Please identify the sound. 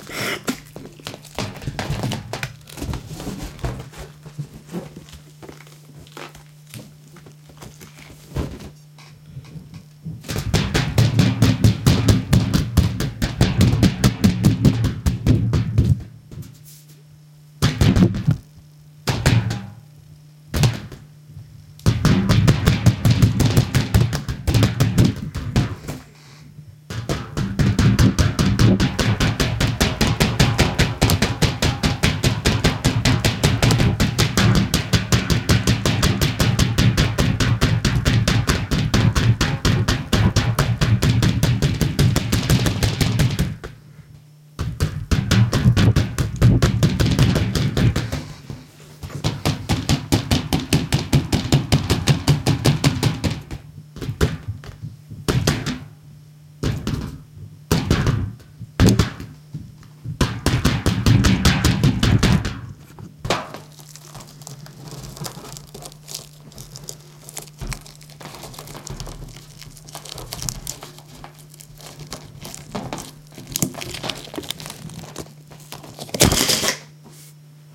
bomp baloon
An inflated balloon toy attached to a rubber band that is bumped around. I tie it off at the beginning, and untie it at the end, letting the air escape so it flies across the room.
Recorded with a Canon GL-2 internal microphone.
unprocessed, repetitions, request, toy, balloon, bounce, ball, toys, thump, bump